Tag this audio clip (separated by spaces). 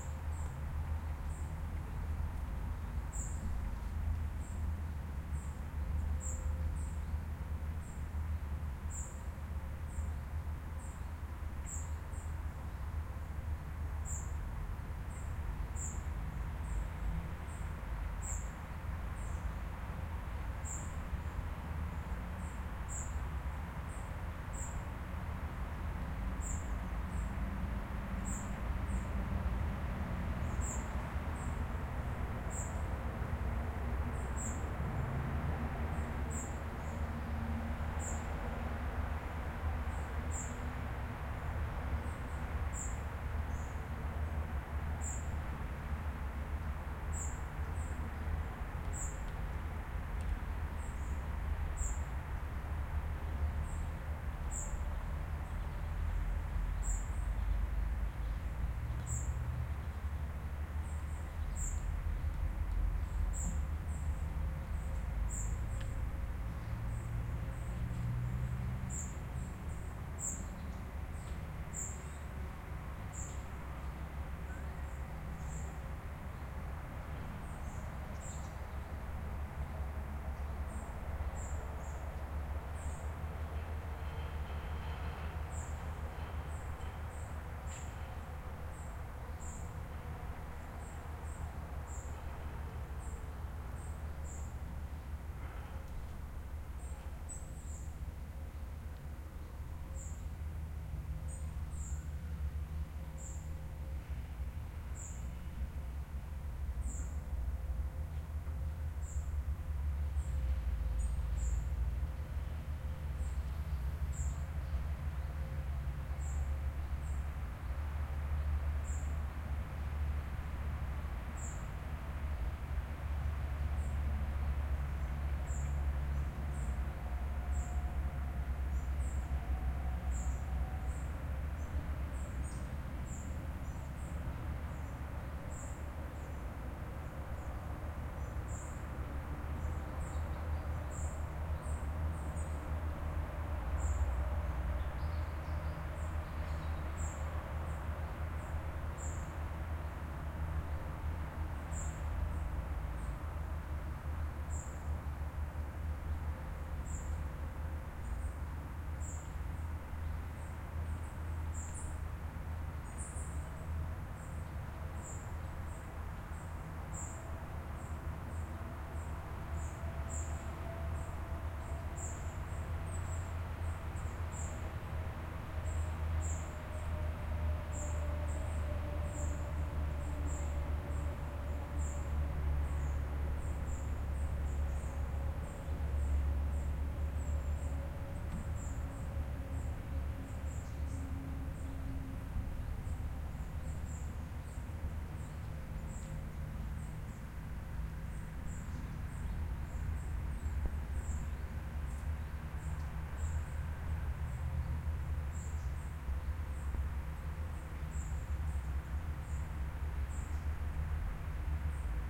birds Bus City Park Public traffic trams Transport